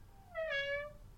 Door creaking.
Mic: Pro Audio VT-7
ADC: M-Audio Fast Track Ultra 8R
See more in the package doorCreaking
creaking, door, door-creaking, noise